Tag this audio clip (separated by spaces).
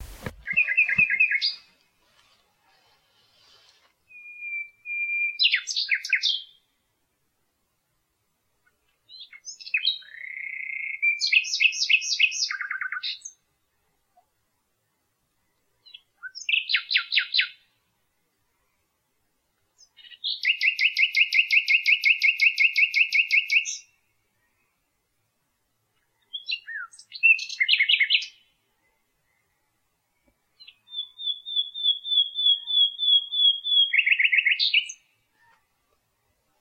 birdsong field-recording night spring